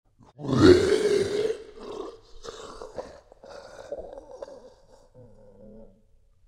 Demon growl 2
demonic, sounds